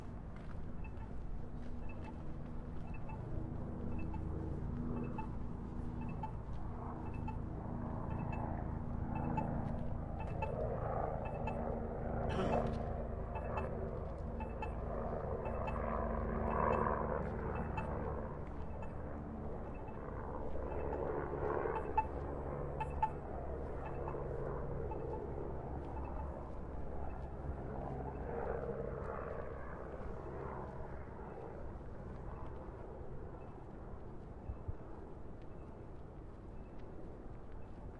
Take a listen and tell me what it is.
BC walk signal plane
The electronic walk signal at a crosswalk